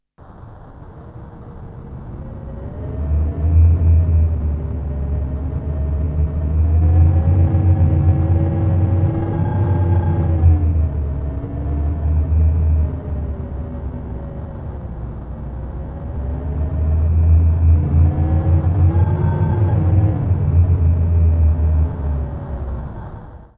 Short recording of Winter wind against Velux window of a converted chapel, December 1998. Recording made with a Shure SM58 to Tascam DAT recorder. No effects or enhancements.